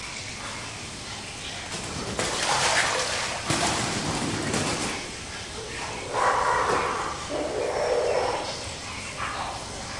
Recording of a Laughing Kookaburra flying, hitting the water of a small pond, and flying away, followed by some calls. Recorded with a Zoom H2.